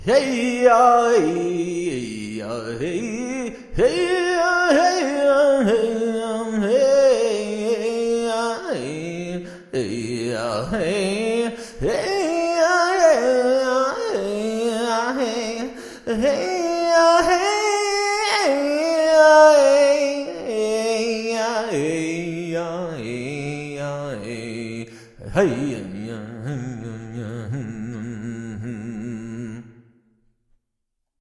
A reversed track of a friend attempting a Native American chant from the American East.